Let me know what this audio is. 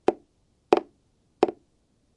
bouncy tap
bouncy, cardboard, h5, SGH-6, tapping, tube, zoom